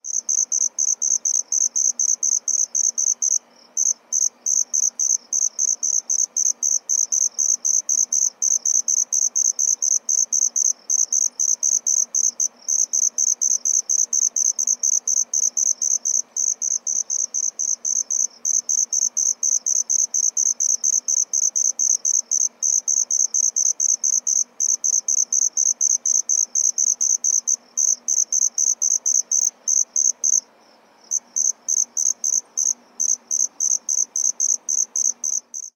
Grillons-Amb nuit2
Some crickets during the night in Tanzania recorded on DAT (Tascam DAP-1) with a Sennheiser ME66 by G de Courtivron.